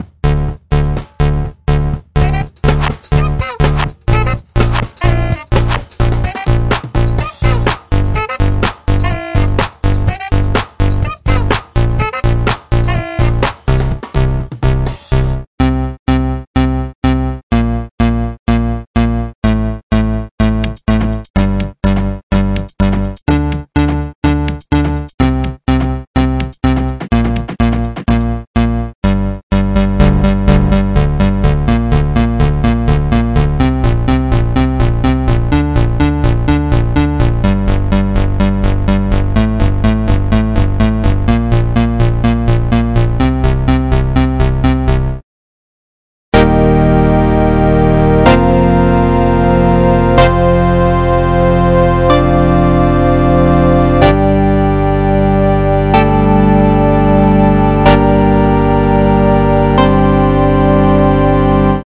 This is my first sound beat.